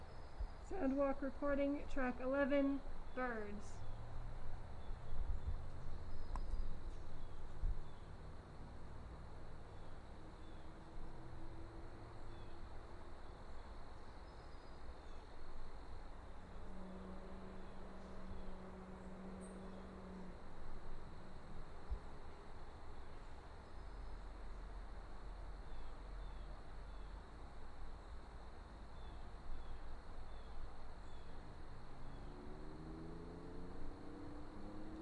Sound Walk - Birds
Birds chirping from trees
birds, chirping, nature